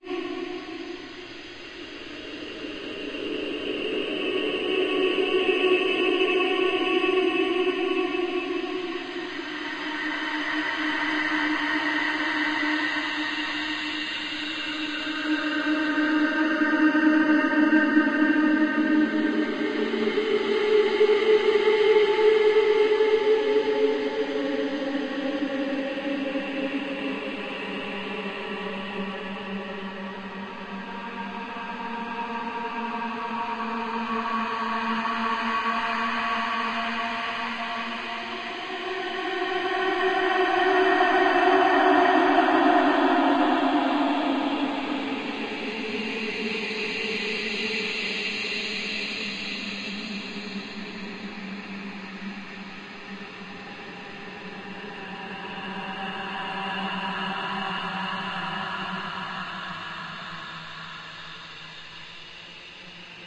Almost illegal, horrifying and purely evil noises created by paulstretch extreme stretching software to create spooky noises for haunted houses, alien encounters, weird fantasies, etc.
paranormal, horror, ghost, stretch, haunting, alien